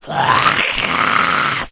A voice sound effect useful for smaller, mostly evil, creatures in all kind of games.
indiegamedev, gamedeveloping, Speak, RPG, fantasy, gaming, imp, Talk